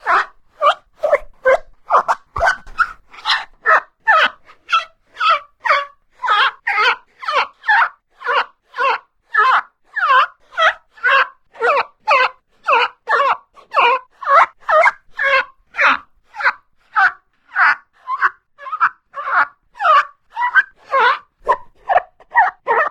Rubber Squeak Type 05 192 Mono
Using a wet rubber sandal to produce a range of different rubber squeaks. Intended for foley but possibly useful for more abstract sound design & creatures.
comical, creak, formant, rubber, squeak, squeaking, squeaky, vowel, wet